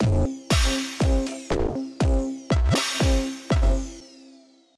glitch sample
Glitch beat made with FL Studio. 120 bpm